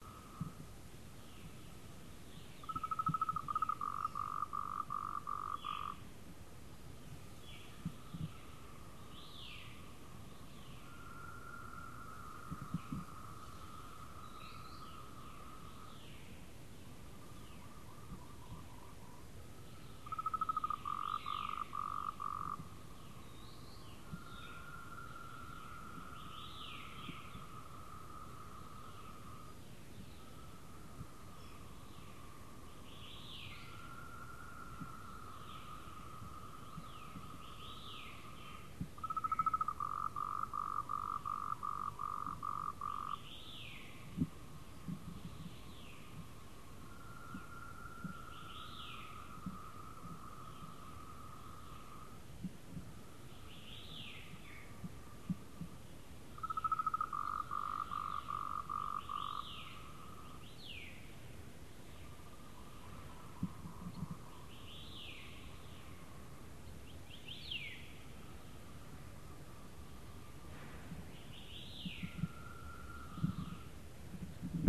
quiet forest with frogs and birds
Daytime recording of frogs and birds in a quiet forest.
bird, birds, field-recording, forest, frog, frogs